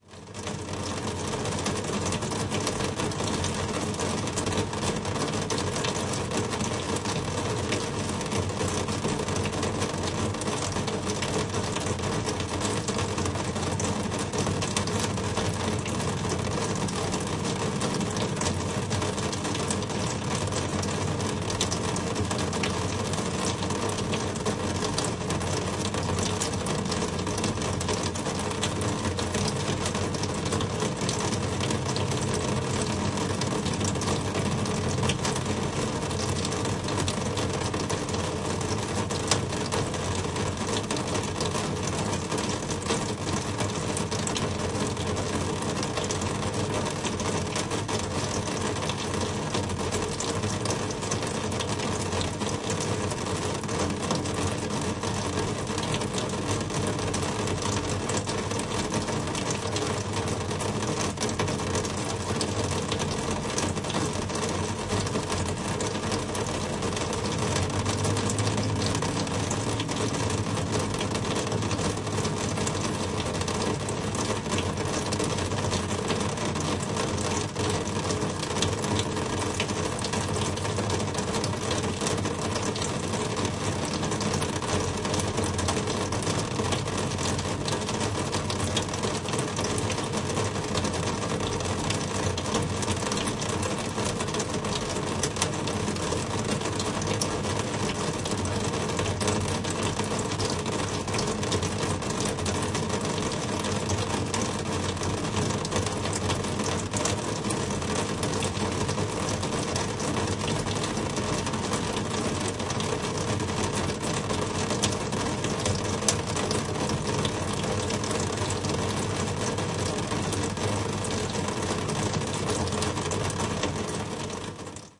Heavy Rain on Sheet Metal
Stereo mix of "Rain on Sheet Metal" 1-5. Close mic'd raindrops on a window air conditioner. Some distant street noise.